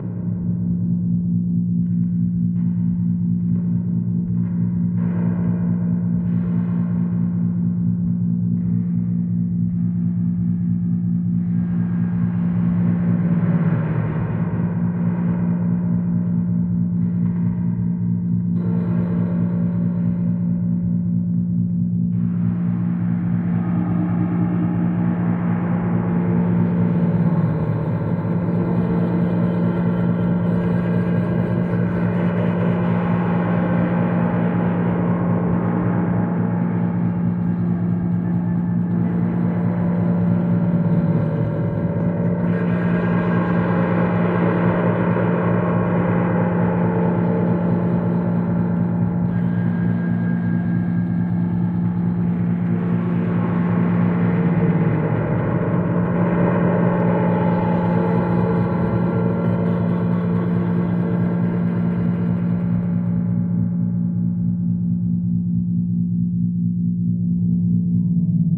alien boiler room
I don't exactly remember how I made this (it's an old file of mine), but I'm sure I'm still using my post-processed (and realtime!) guitar techniques to create those weird sounds. The drone itself is, I think, a heavily modified sinewave synth.
background, boiler, dark, creepy, alien, effect, room, scary, drone